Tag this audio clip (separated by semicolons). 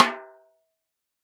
1-shot; velocity; multisample; snare; drum